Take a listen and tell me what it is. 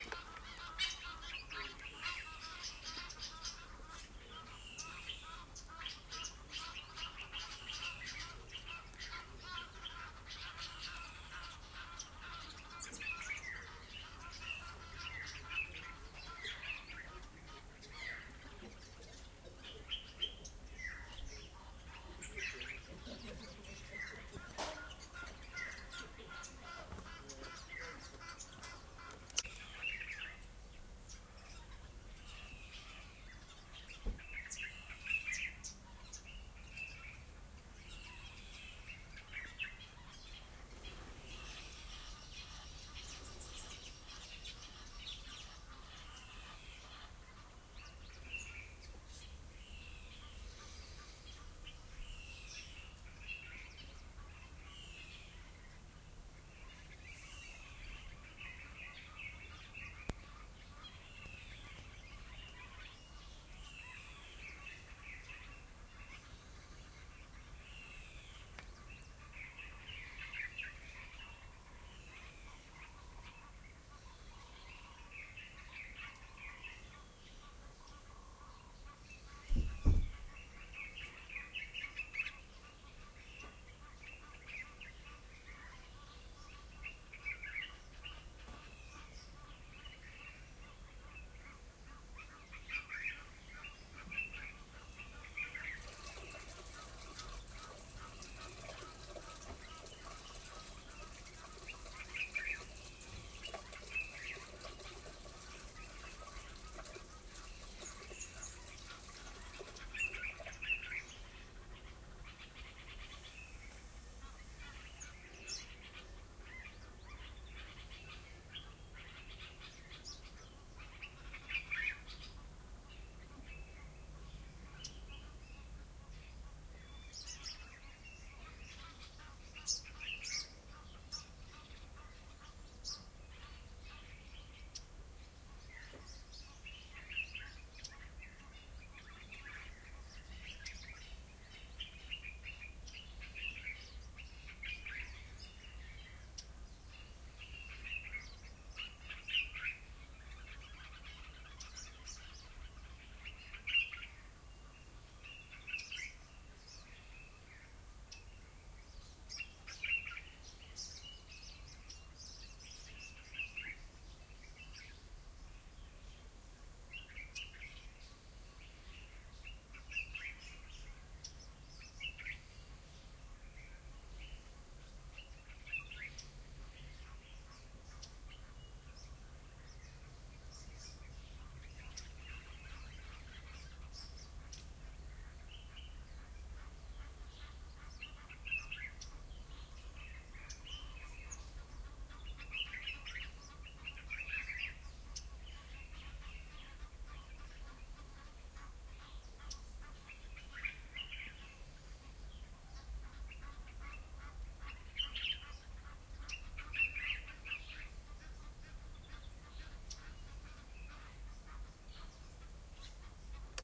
MerlothPark morning ambiance
Morning ambiance at Marloth Park (sorry for typing it wrong in the file name!), near the Crocodile River, South Africa. Marantz PMD751, Vivanco EM35.
birdsong, bird, birdcall, savanna, south-africa, africa, field-recording